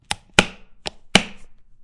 Close small military case
She hears I close an Outdore case from B & W International.
Recorded with: the t.bone sc400
Recording software: Adobe Audition (2017)